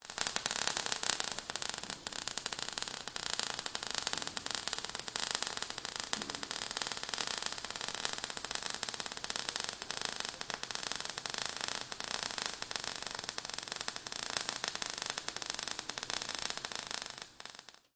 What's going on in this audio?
A welding torch heating the edge of a steel plate. The torch sent out a great deal of sparks due to the proximity to the plate edge where there was a great deal of available oxygen. The heated particles popping off is what makes that "popcorn" sound.